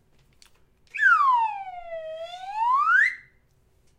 Slide whistle recorded on a Autotechnica ,mic AT2020
down, goofy, silly